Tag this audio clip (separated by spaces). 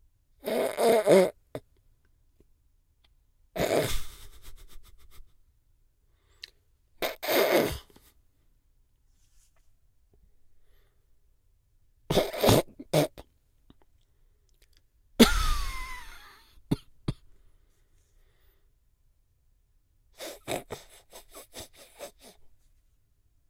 snicker snickering